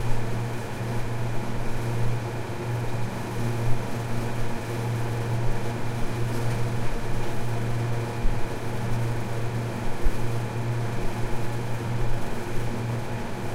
Large industrial refridgerator, electric machine engine noise, field recording,
Recording device: Roland R-26 portable digital recorder.
Microphone: Built-in directional XY stereo microphone.
Edited in: Adobe Audition (adjusted gain slightly, for a good signal level).
Date and location: October 2015, a refridgerator in an industry in Sweden.
Wish you success!